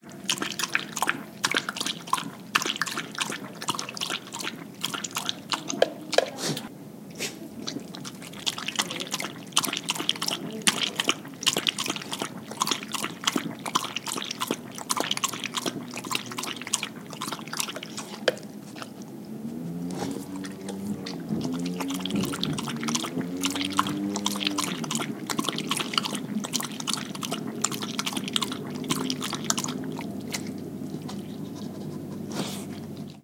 rhythm dog drinking 1
Rhythm of a dog drinking water (Barcelona). Recorded with MD Sony MZ-R30 & ECM-929LT microphone.
dog, drinking, rhythm